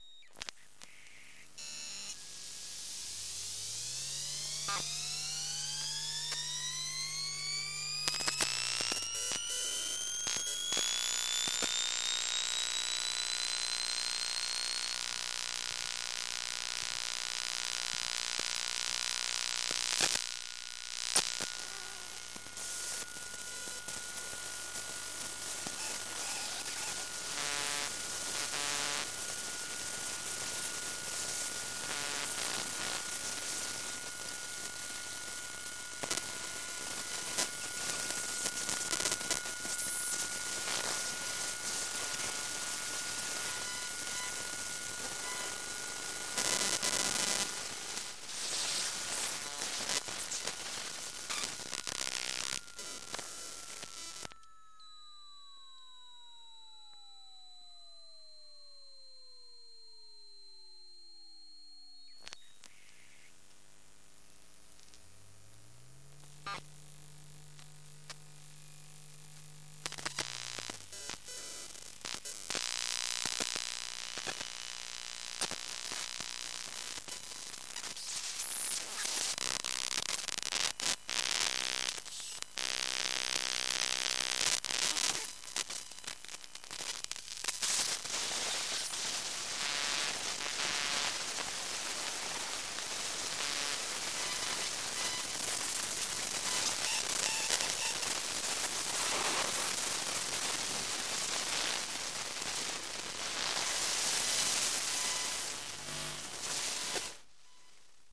Electromagnetic Computer Sequence Mono Elektrousi
Electromagnetic - Computer - Sequence.
Gear: Elektrousi.
buzz, computer, electromagnetic, electronic, elektrousi, glitch, hum, interference, machine, mono, noise, zoomh5